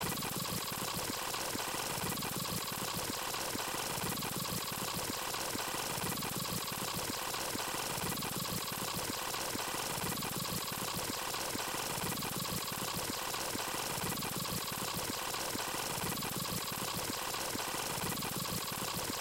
tape sound, fast fowardmade by playing a song of 4 min in 3 sec and then pitching